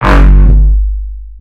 Dub Sound G3 140bpm "Oehmm"
A dubstep saw made and modulated in Sytrus (FL Studio). 140bpm in G3. Left raw and unmastered for your mastering pleasure. An "oehmmm" sound.
sound, rough, synth, synthesizer, fx, robot, saw, flstudio, electronic, wah, warble, dub-step